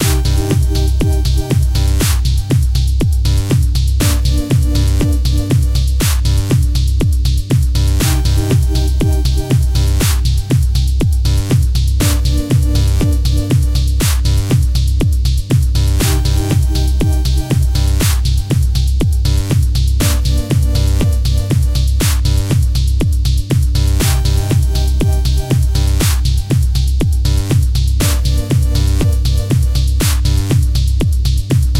Electronic music loop M1.
Synths:Ableton live,Silenth1,M1
Synth, original, loop, bass, techno, kick, electro, music, house, hard, M1, Electronic, beat, drum, dance